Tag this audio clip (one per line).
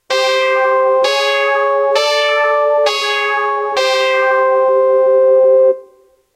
blackout
movie
film
video
game
video-game
animation